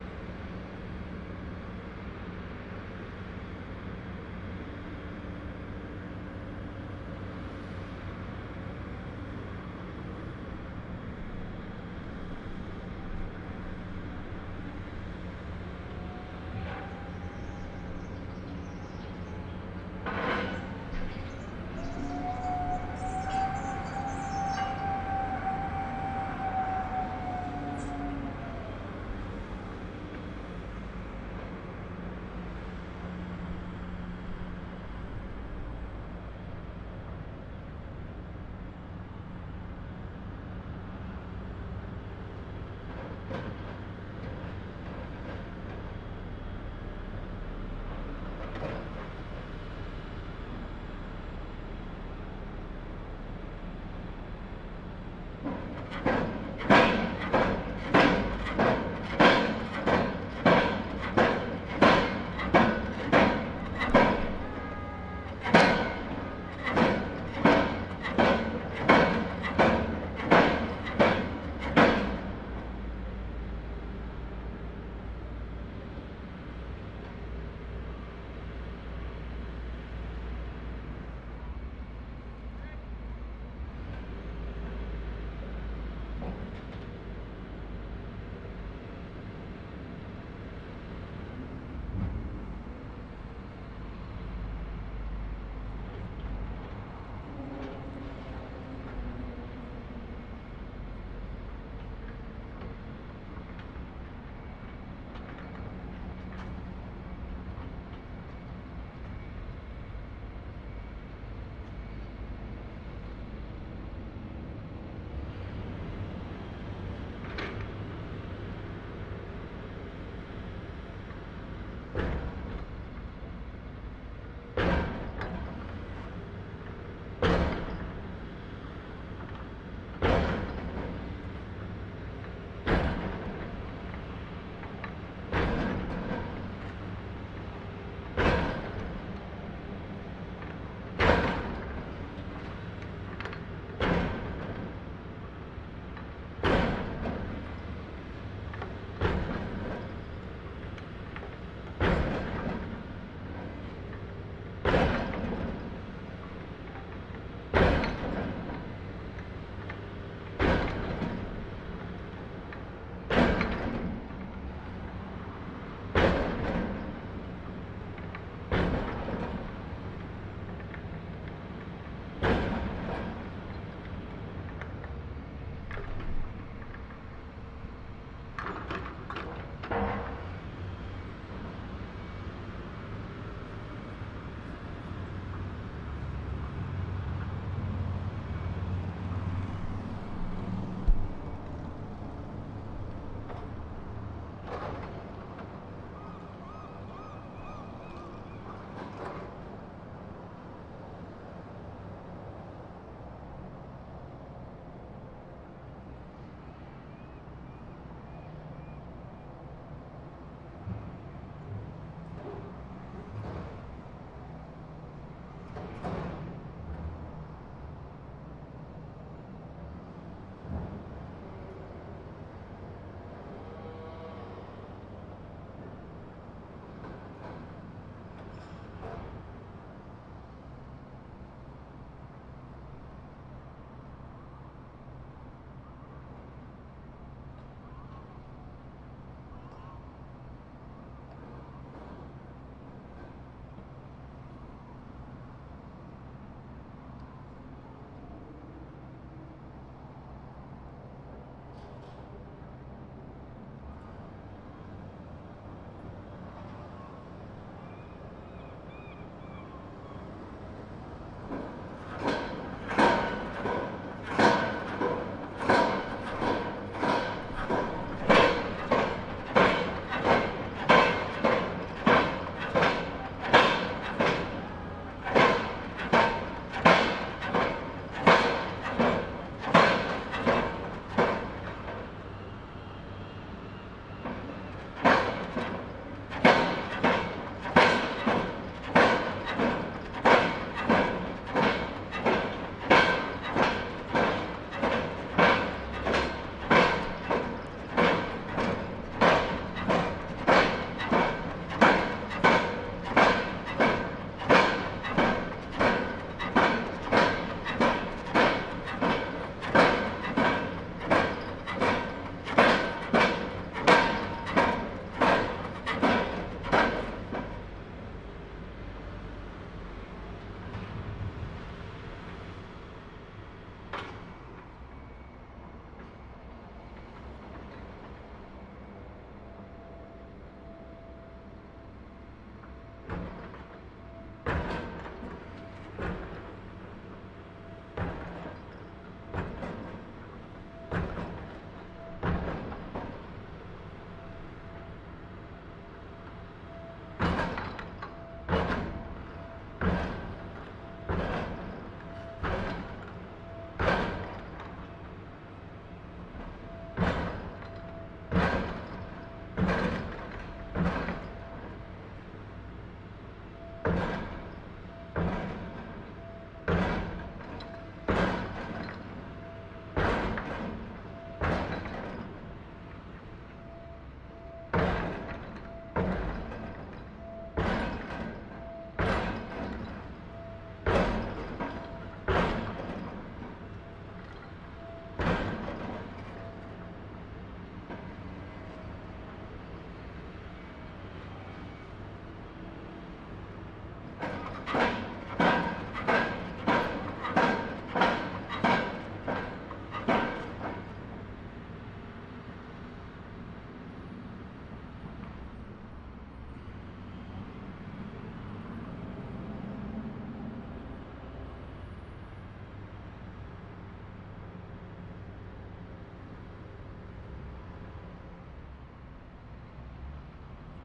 a 7:00 clip of the construction around a new bridge that includes a barge mounted pile driver striking steel piles into the water
Summer 2013
Construction audio as crews work to replace the Johnson St. Bridge in Victoria, BC, Canada